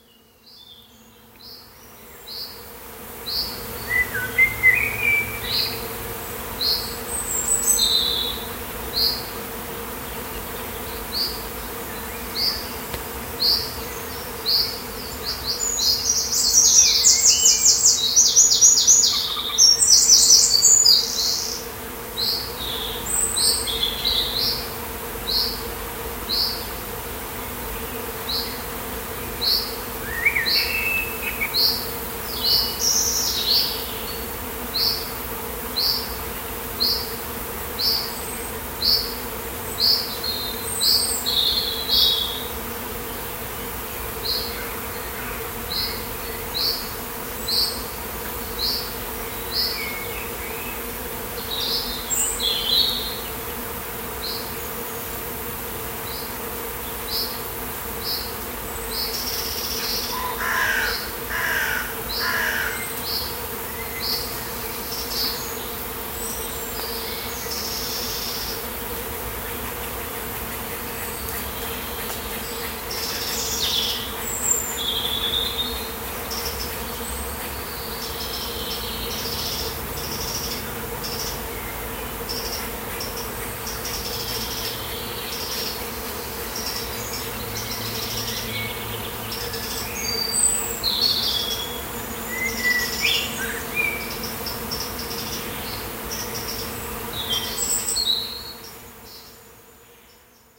A swarm of bees in a tree recorded near Rode, Somerset, UK in May 2017.
Somerset, birds, bees, England, spring, birdsong, swarm, UK, nature, field-recording, rural, ambience, insects, countryside